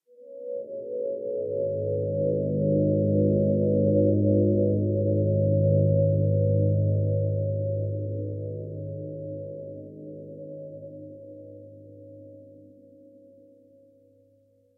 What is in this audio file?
sax sequence 13
sax processed sample, filtered 100-600 Hz